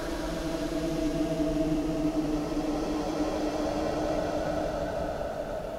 STECZYCKI Ronan 2016 2017 scream
I took a really short extract of someone laughing, add a paulstretch effect to it and lower the high-pitched part of it. I’ve added a reverberation effect and extended the lenght of it. I’ve added a fade-out effect to make it seems like some far away scream in a cave that’s echoing to finally vanish.
Typologie : V
Morphologie : son seul complexe
Timbre harmonique : terne
Allure : non
Grain : lisse
Dynamique : abrupte mais graduelle
Profil mélodique : glissantes
echo, scream, roar, monster, cave